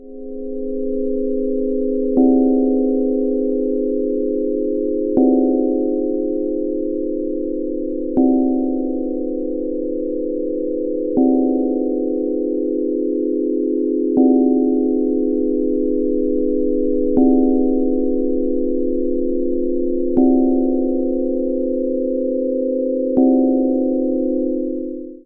Dissonance - Supercollider
additive, fx, sci-fi, supercollider, synthesis